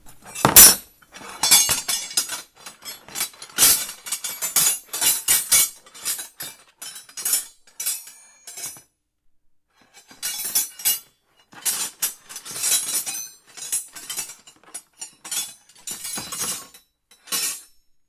Tool Box
Metal sounds from searching tools in a toolbox. Recorded with Zoom H4N.